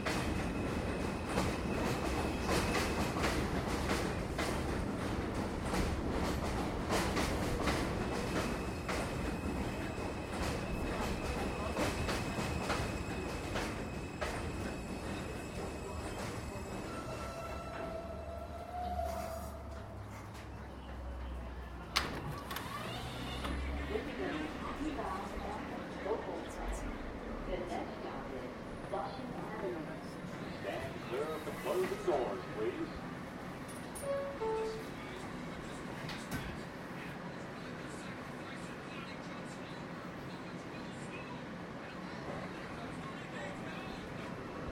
NYC ambient subway M train
A short journey on the M train in Brooklyn with Flushing Avenue announcement. Zoom H1
announcement, field-recording, M, NYC, subway, train, transport